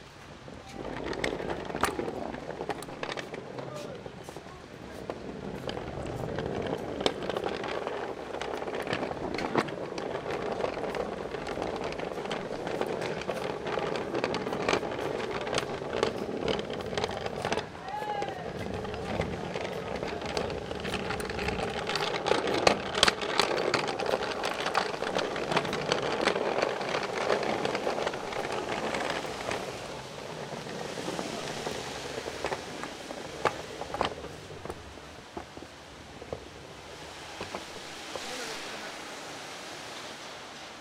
A trolley / wheelbarrow dragged with steel alloy wheels rolls on the floor of a crowded mill; Neumann KMR81 recorded on a Sound Devices 664